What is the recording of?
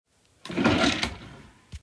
A drawer opening.
drawer-opening, opening, drawer, open